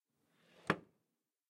close-wooden-box
Closing a wooden box. Nothing more, nothing less
lid, close, door, wood